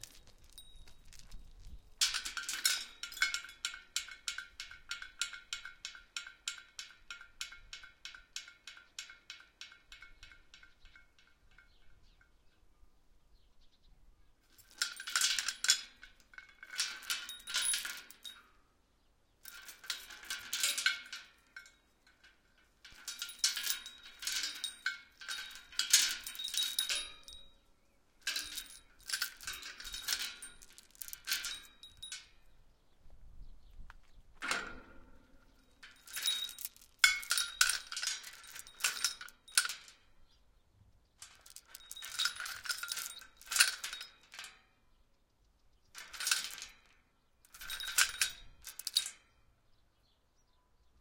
small chain wrap around metal gate bars like locking it various1
small chain wrap around metal gate bars like locking it various
metal, lock, bars, gate, wrap, chain, small